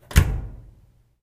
dryer door close
some familiar household laundry sounds. mono recording. concrete/basement room. dryer door closing.
dryer, laundry, washer